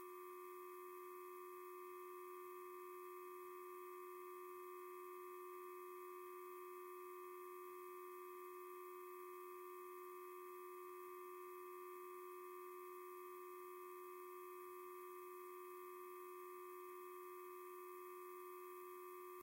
a nice harmonic hum, captured from an old lamp using a stereo coil-pickup (circuit sniffer).
circuit sniffer-> PCM M10.